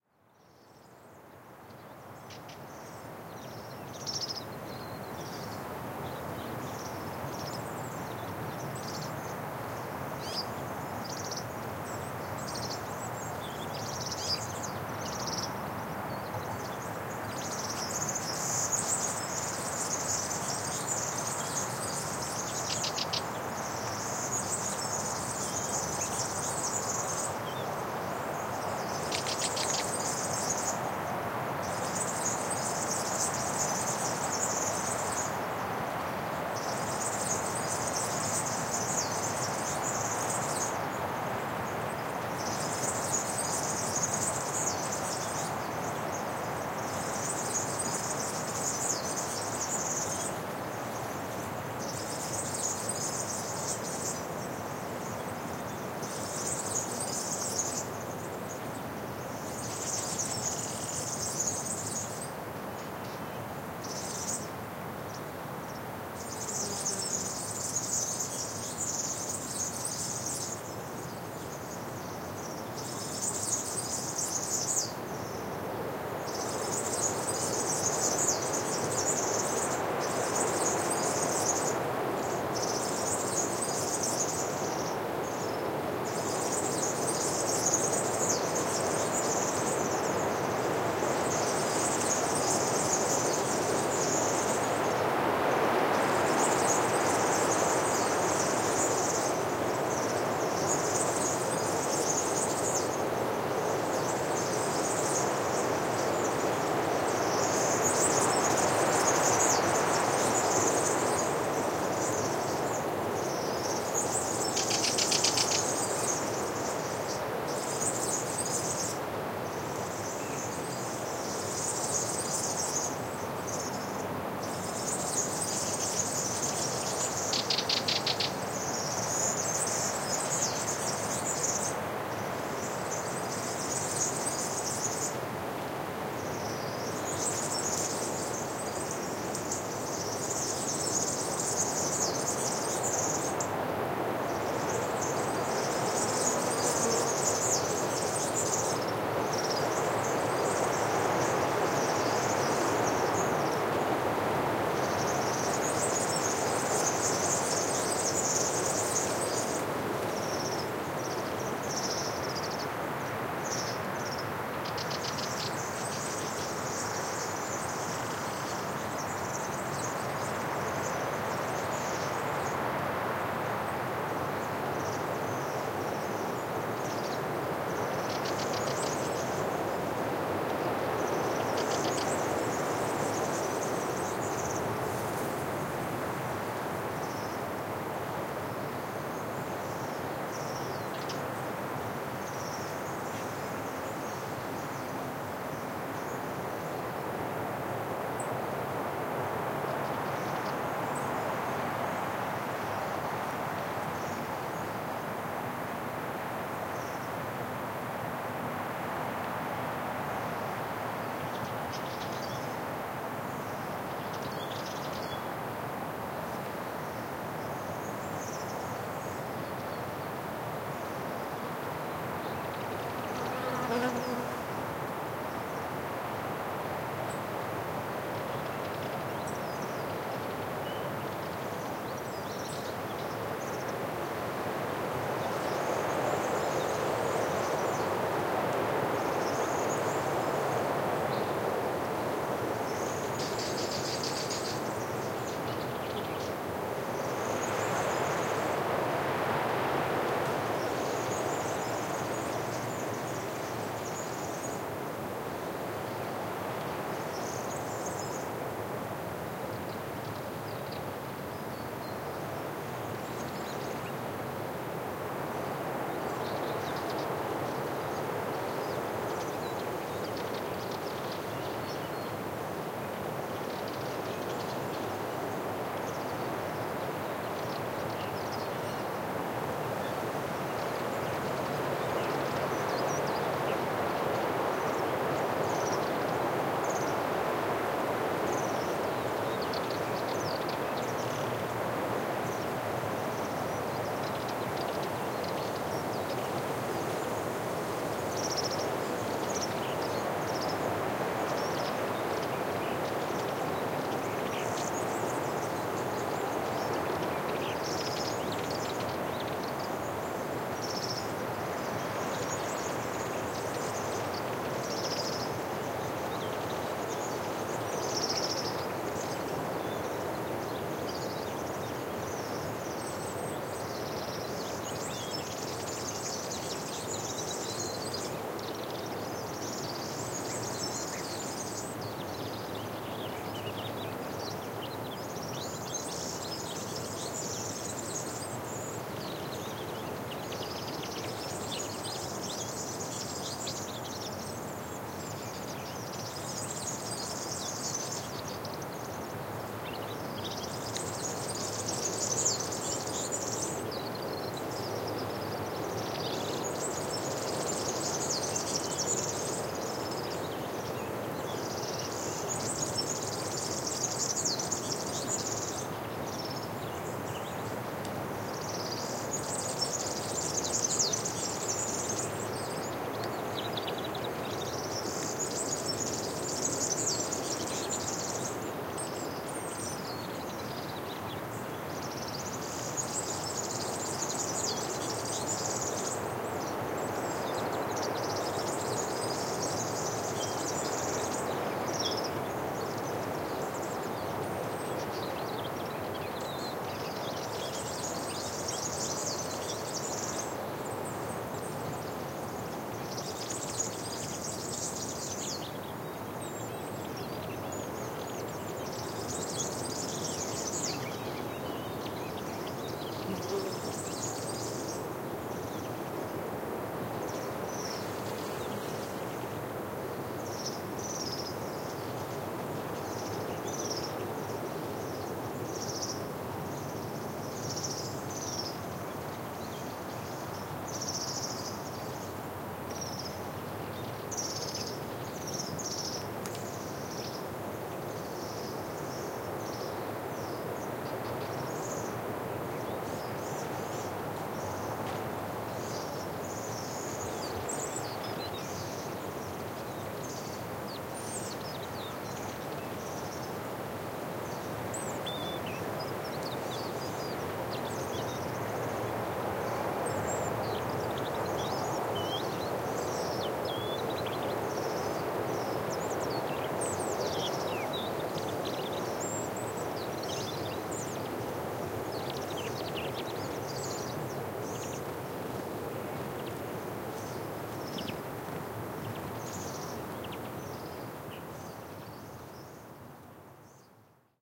20180221 windy.forest
Pine forest ambiance, with wind on trees, bird tweets (Warbler, Serin) and some insects. Sennheiser MKH 60 + MKH 30 into Shure FP24 preamp, Tascam DR-60D MkII recorder. Decoded to mid-side stereo with free Voxengo VST plugin
ambiance, field-recording, south-spain